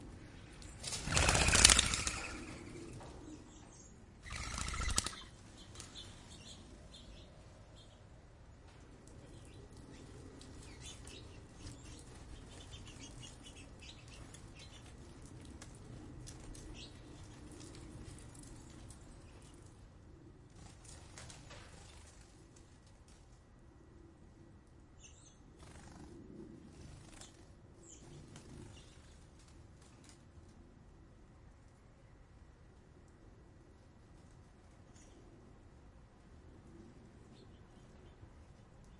Winter birds at bird feeder, flying eating, calls, wide stereo spaced EM172s. Niagara-on-the-Lake.